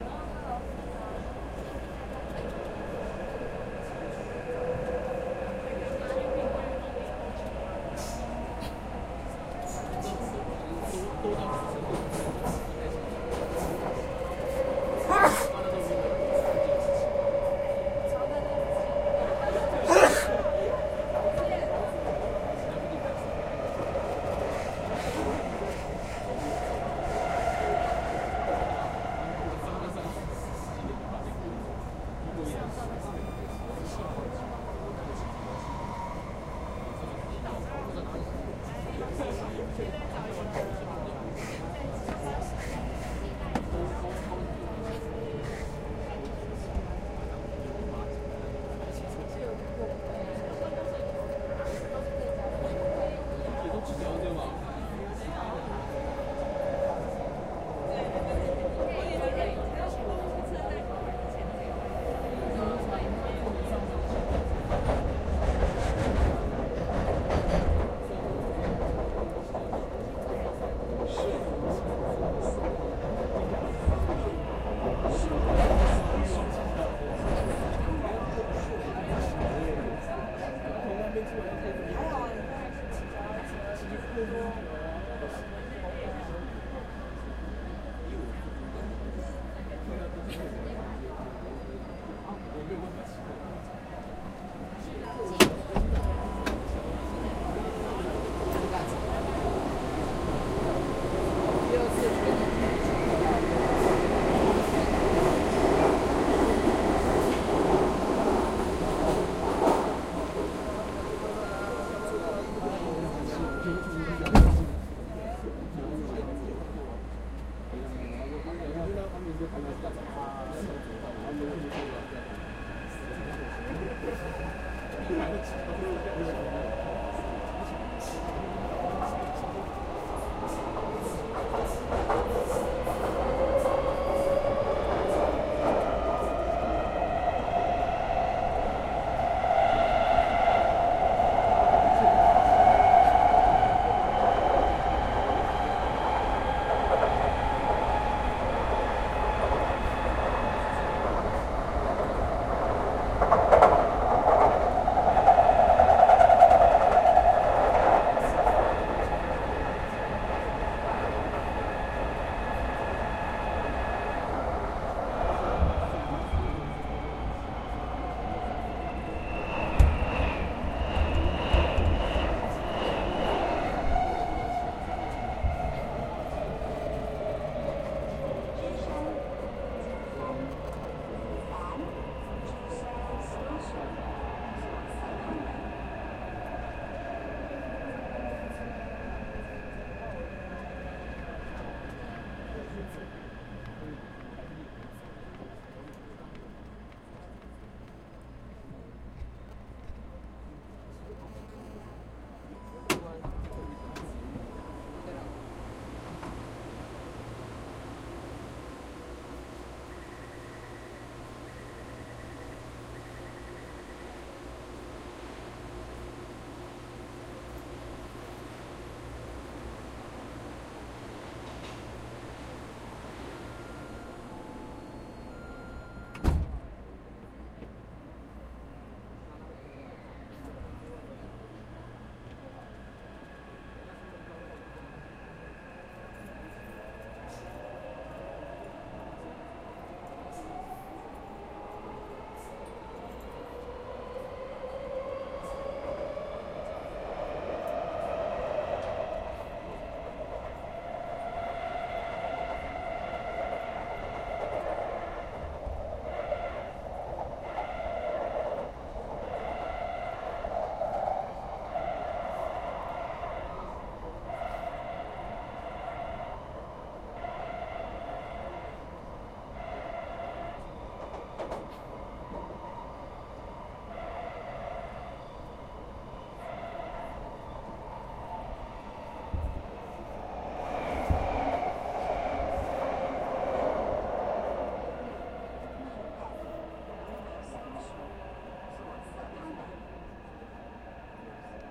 metro subway Taiwan
at beside the door
metro, door, noise, subway